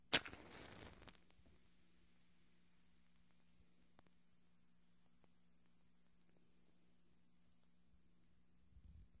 Another match strike with lengthy burn.